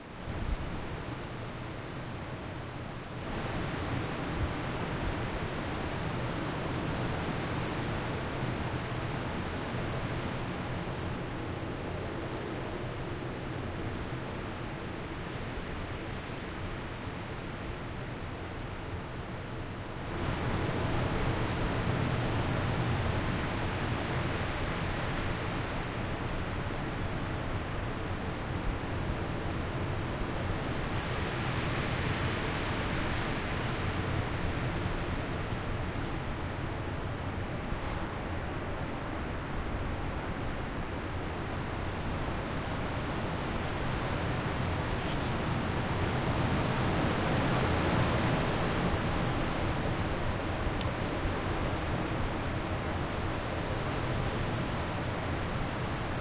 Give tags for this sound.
high autumn natural-soundscape

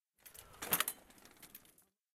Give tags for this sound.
freewheel ride rider whirr park approach click jump bicycle street downhill bike chain terrestrial wheel pedaling

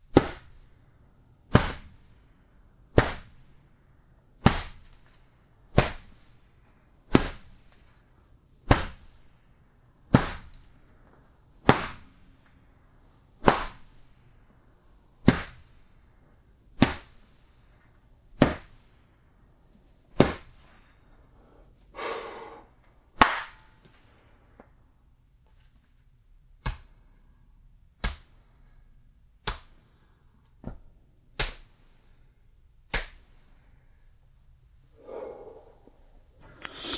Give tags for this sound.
hit body smack punch slap thud fist fight impact

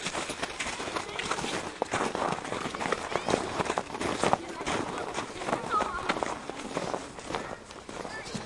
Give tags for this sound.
Essen Germany School

